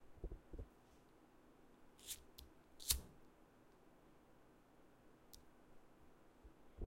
This is the sound of a lighter igniting.
fire, zippo, lighter